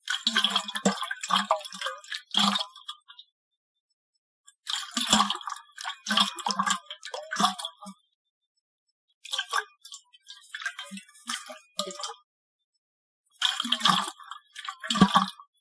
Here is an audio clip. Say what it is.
Water Slosh in Metal Bottle - various
Stainless steel "Swell" water bottle half filled with water and shaken around. Various sounds of the liquid sloshing inside the bottle. Sounds like water inside a metal canteen.
shake, stir, container, splash, slosh, foley, liquid, water, jug, steel, canteen, bottle, metal